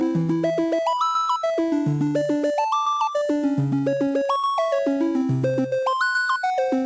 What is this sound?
Familiar sounding arpeggio from the 8-bit era